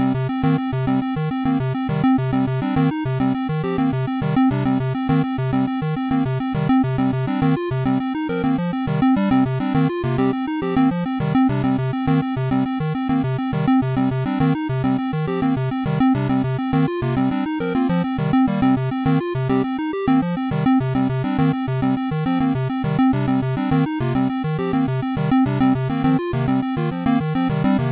Simple fun beat for different projects.
Thank you for the effort.